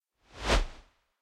VS Short Whoosh 5
Short Transition Whoosh. Made in Ableton Live 10, sampler with doppler effect.
sfx, whoosh, fx, swoosh, transition, sound, foley, short, fast, woosh, swish, game, video, effect